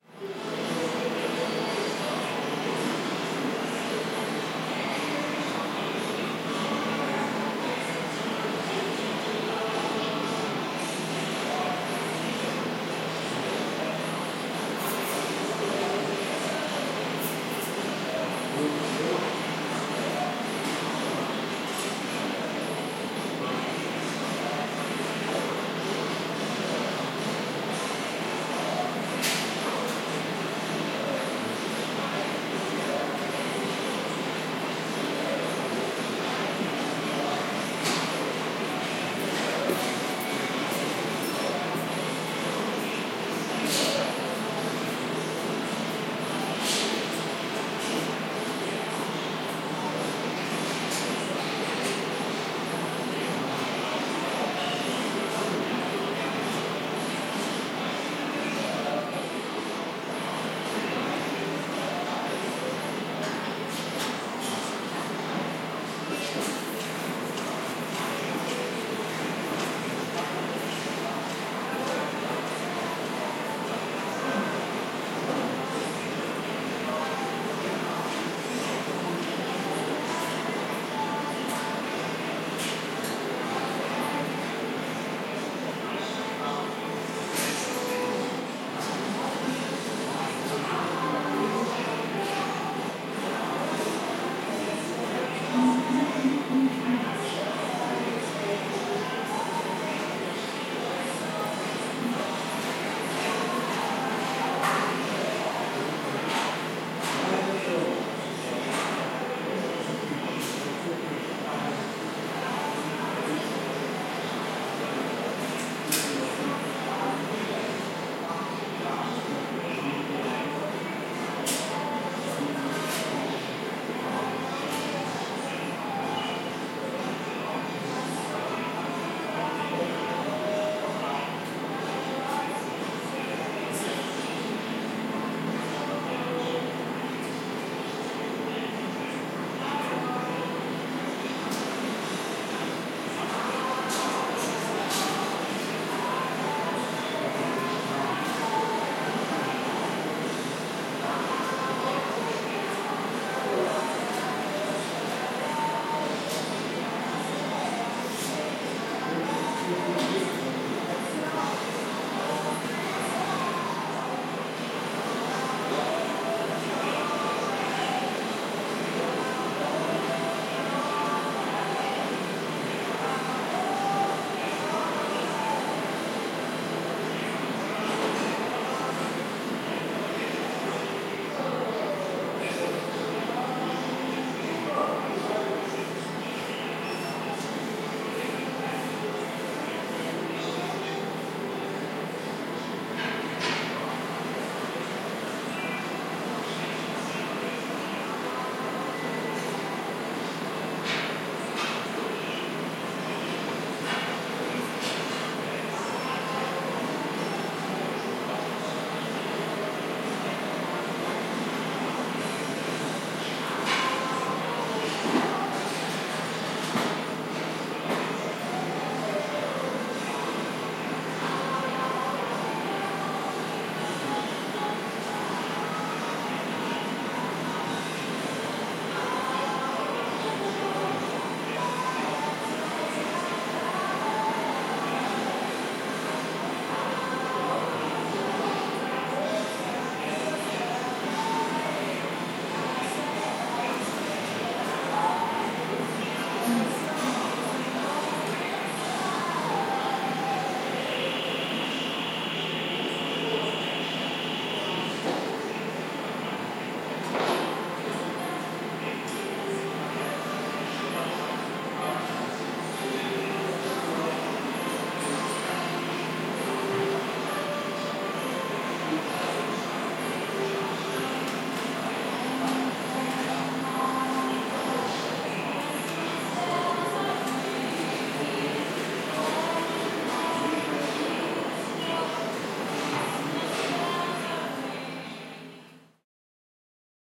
Ambience inside of a German building store, recorded on Zoom H4N.